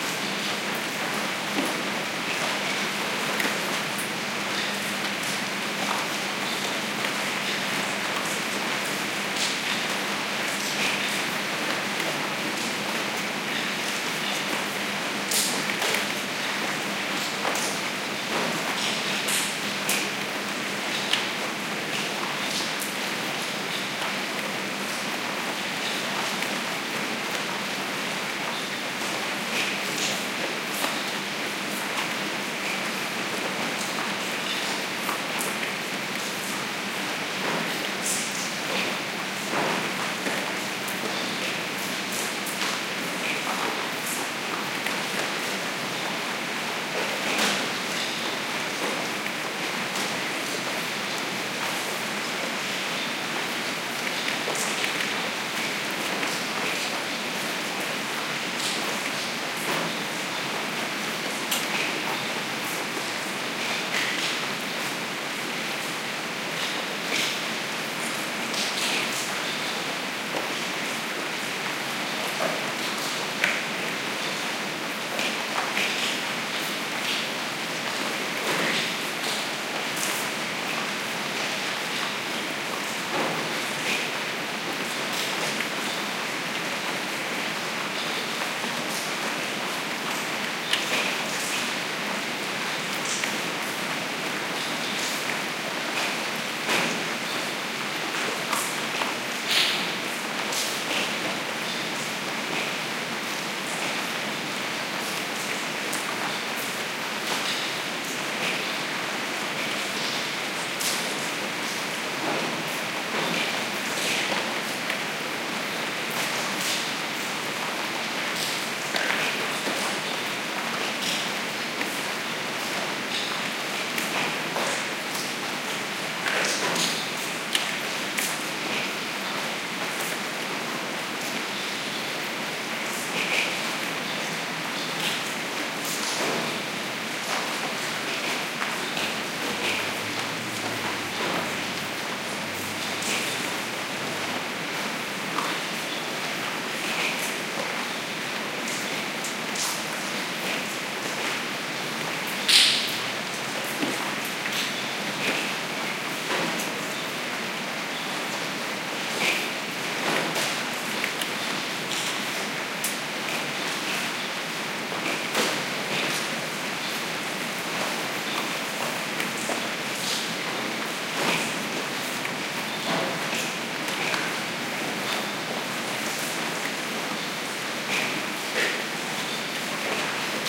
Noise of rain falling inside a courtyard. Sennheiser MKH 60 + MKH 30 > Shure FP24 preamp > Tascam DR-60D MkII recorder. Decoded to mid-side stereo with free Voxengo VST plugin